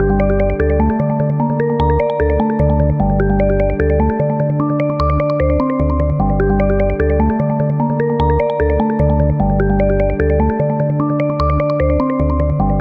075 Persephone dm Bass+SQ
A loop made with my modular